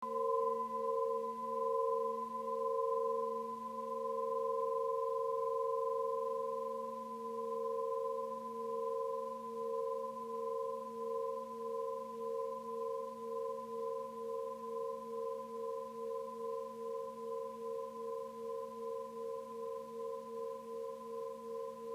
Crystal pyramid pure tone